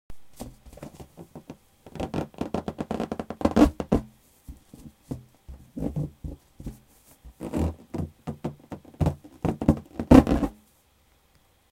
romper esplotar arrancar
arrancar, esplotar